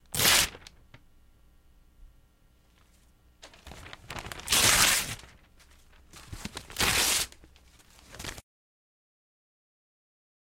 rasgando periodico con fuerza
ripping newspaper roughly